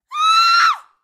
Woman Scream 3
Close mic. Studio. Young woman scream.
human, scream, woman